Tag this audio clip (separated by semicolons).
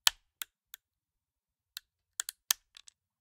cracking; nut; nutcracker; opening